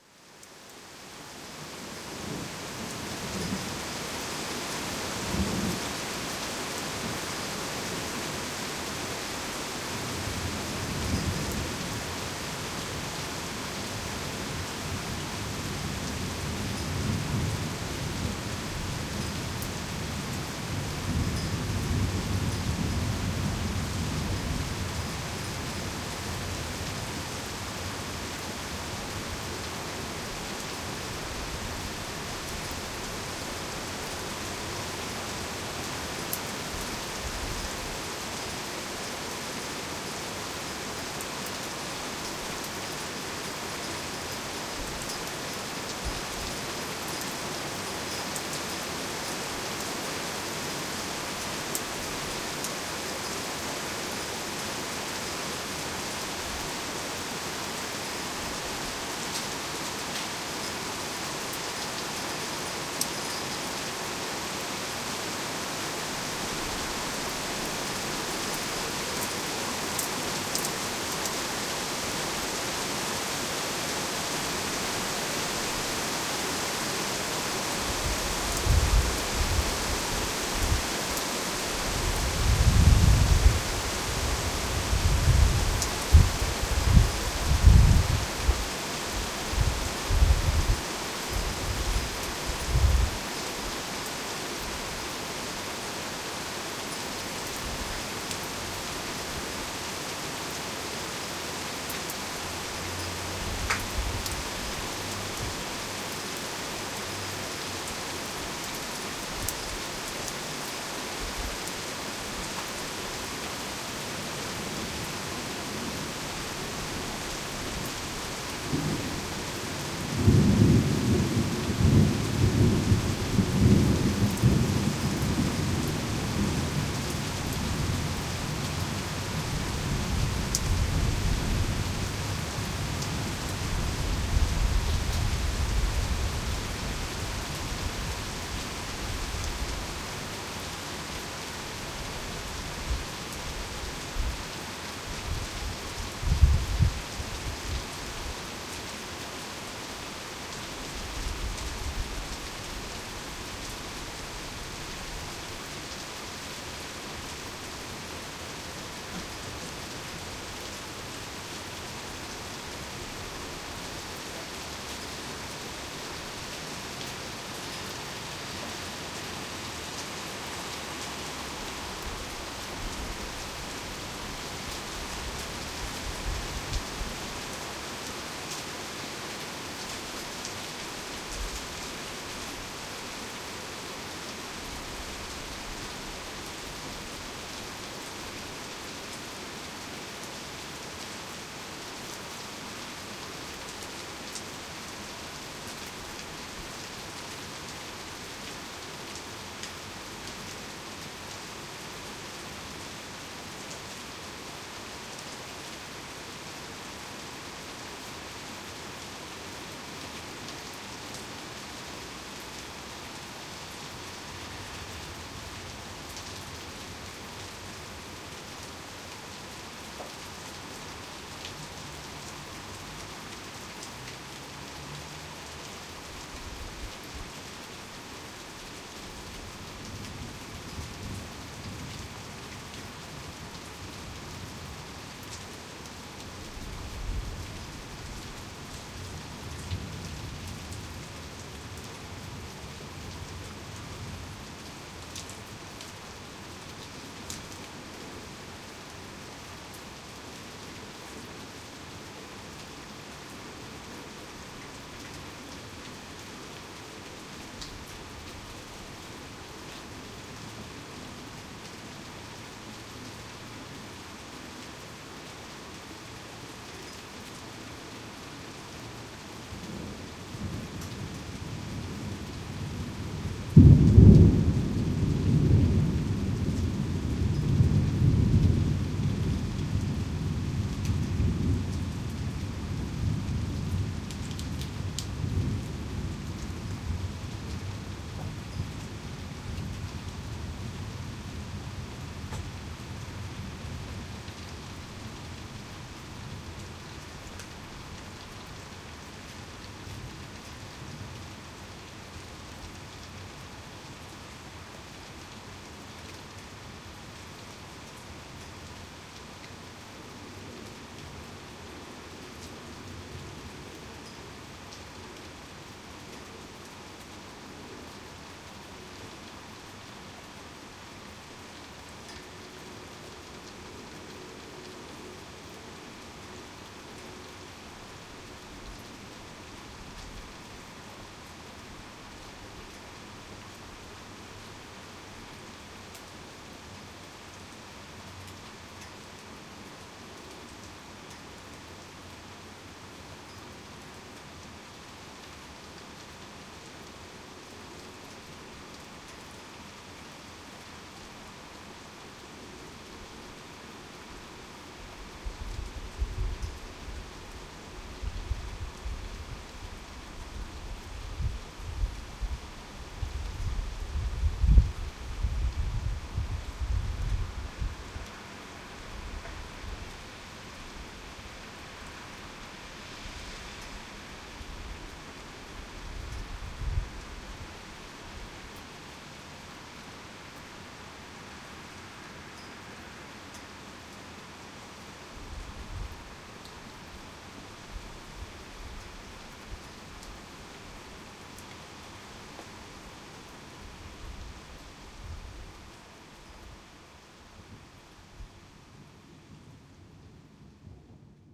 Rain Storm - (Evosmos - Salonika) 01:51 21.09.11
Heavy Rain Storm in Evosmo-Thessaloniki (part 2). I used the ZOOM Handy Recorder H2 (open window of my apartment). Making use the Adobe Audition 3 to edit the file. Enjoy.
Ambient, Environment, Natural, Rain, Relax, Storm, Water